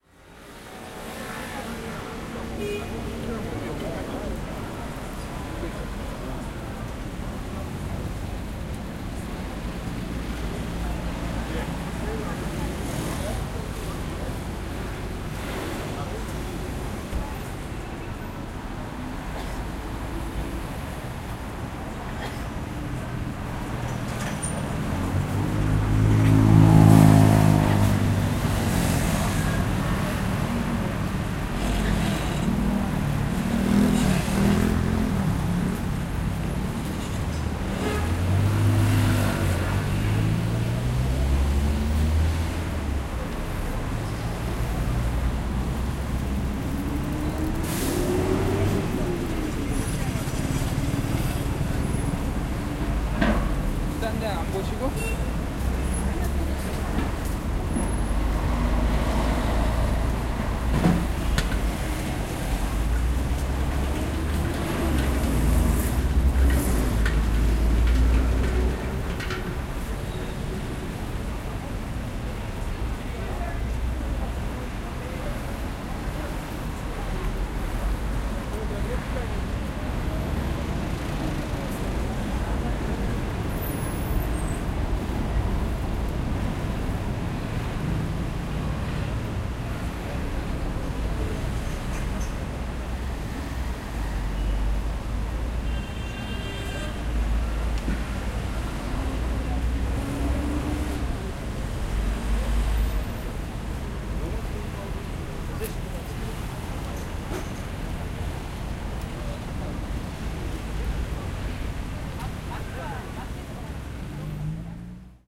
0338 Market entrance
Jungang Market entrance. Traffic, people talking in Korean.
20120629